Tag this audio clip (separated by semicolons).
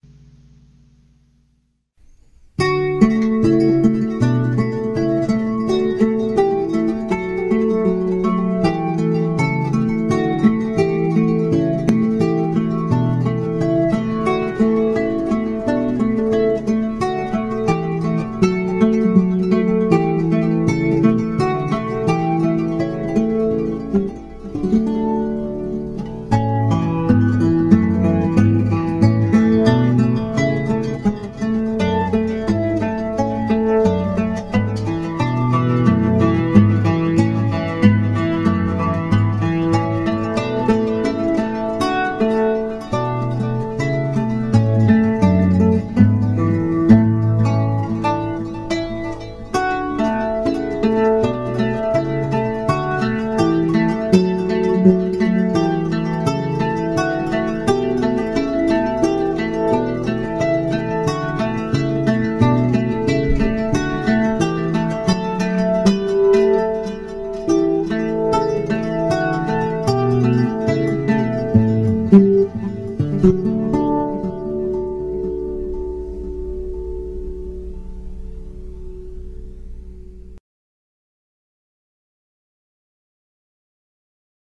Schumann; Classical; Guitar